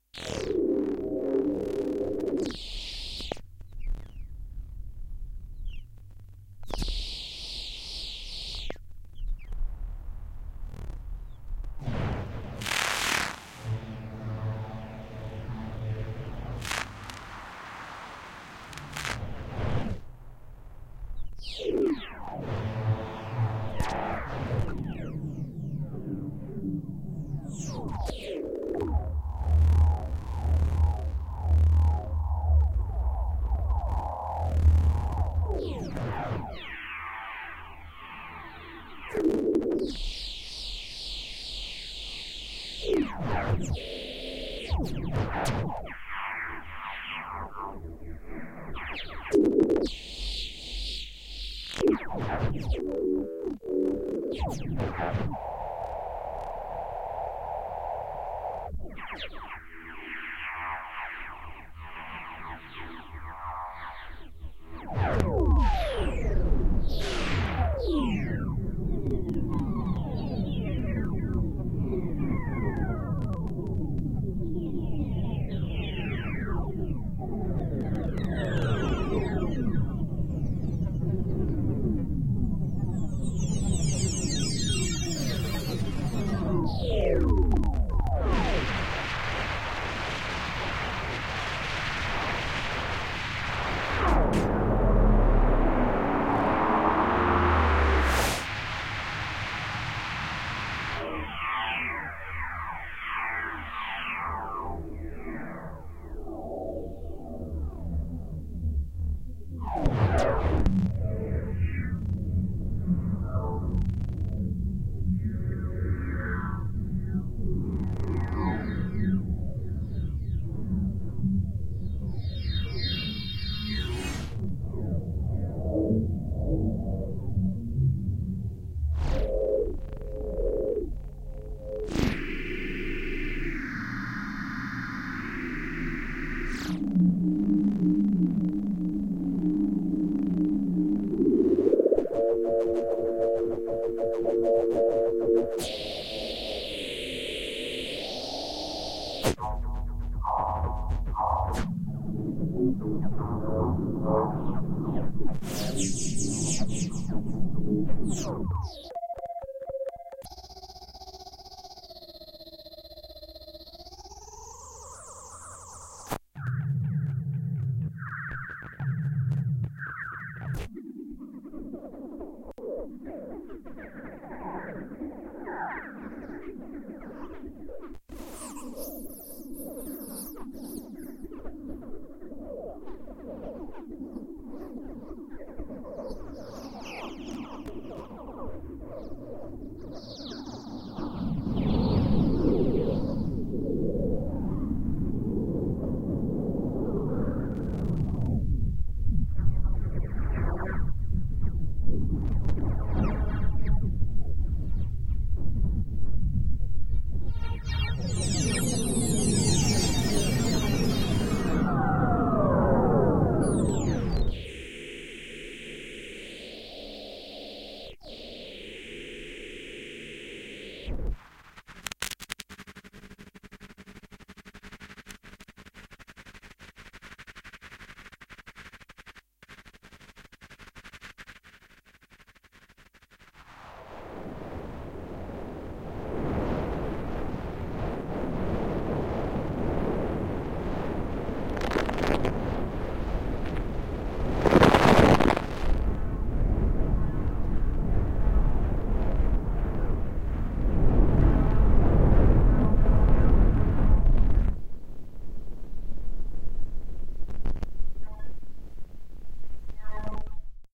Postprocessed (granularized) sample of me playing Reaktor5Demo.
chirp, experimental, granular